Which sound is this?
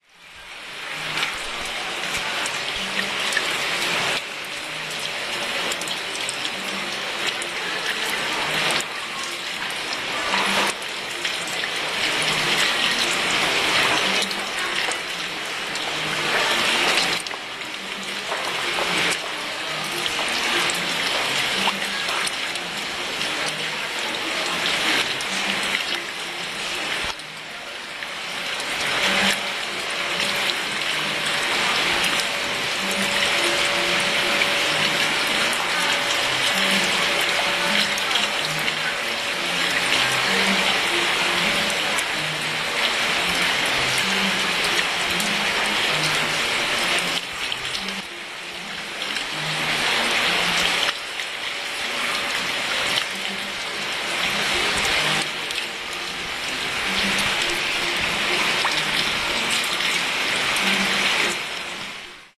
fountain in commercial center 211210
21.12.2010: about 20.15. Kupiec Poznanski commercial center on Strzelecka street. the fountain sound located in the main hall in building. in the background music, voices, opening the doors.
noise, commercial-center, drone